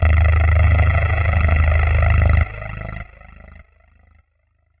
THE REAL VIRUS 03 - HYPER ANALOG SAW WITH CHORUS AND COMB FILTERING - G#0
Two hyper saw oscillators with some high pass & low pass filtering, heavy analog settings, some delay, chorus and comb filtering. The result is a very useful lead sound. All done on my Virus TI. Sequencing done within Cubase 5, audio editing within Wavelab 6.
lead, multisample, saw